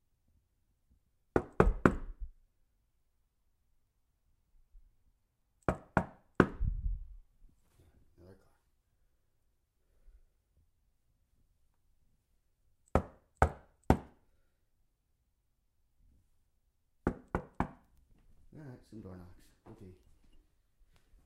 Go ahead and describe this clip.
Simple. Knocking on wooden door. SonyMD (MZ-N707)